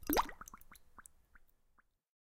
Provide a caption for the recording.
Just a water blub.
blub, water